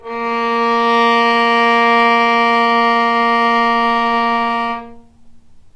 violin arco non vib A#2
violin arco non vibrato
arco, non, vibrato, violin